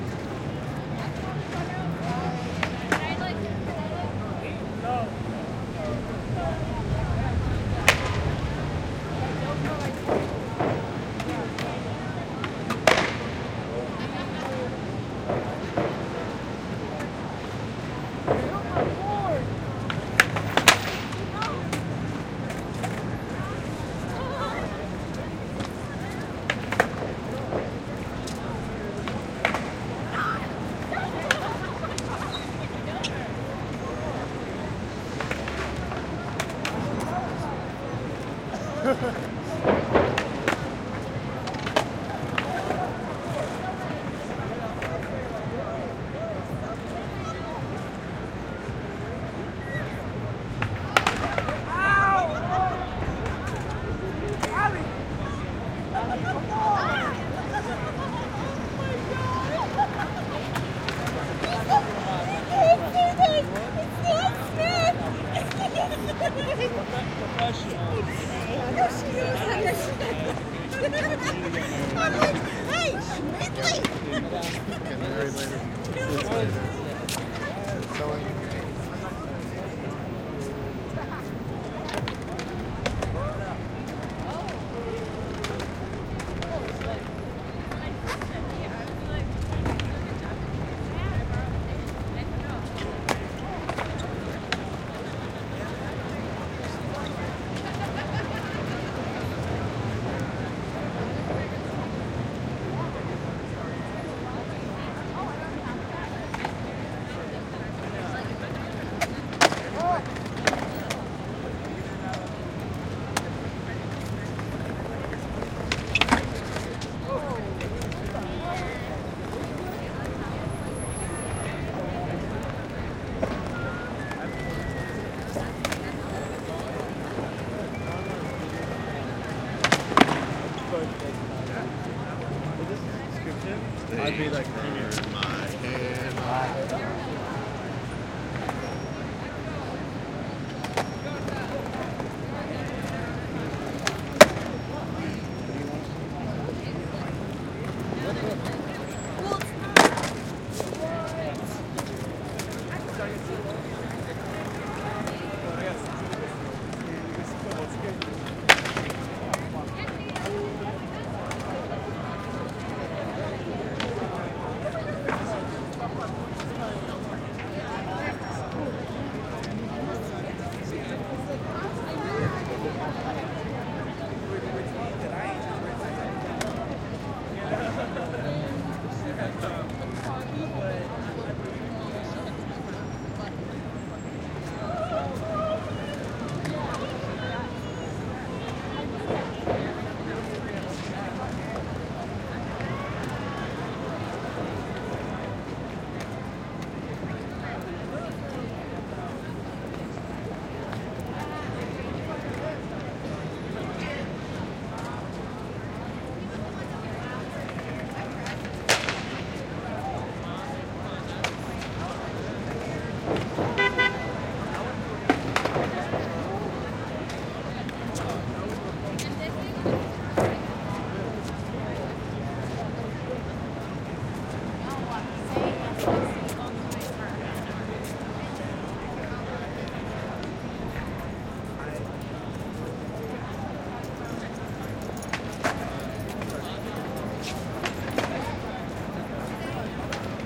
14 Street NYC Skater Amb Vox 1am FSP4824
This is an ambient recording of the late night crowd of kids at 14th street Unions Square NYC. It's where the market usually is during the day. There is some traffic present but it's mostly about the voices and energy of teens. You can hear the clack and roll of skate boards, voices and gritty near by footsteps. The file contains walla quality voices and up close stuff that you can understand. It is a tad left heavy so you may have to adjust if you are using it for stereo.
voices, vox